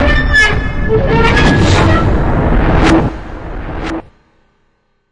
Crazy impact 14
Effects recorded from the field of the ZOOM H6 recorder,and microphone Oktava MK-012-01,and then processed.